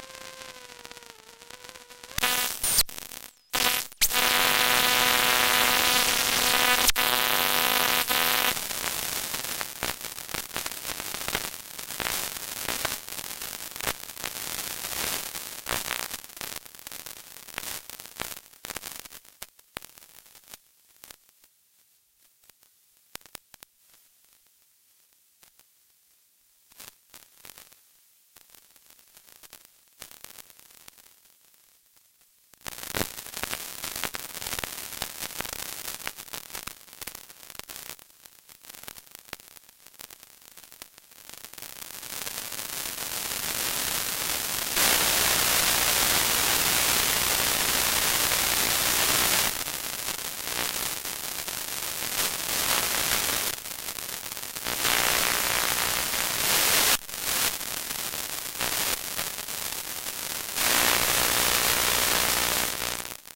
A cheesy AM/FM/TV/CB/WEATHERBAND radio plugged into the dreadful microphone jack on the laptop out on the patio.
interference, radio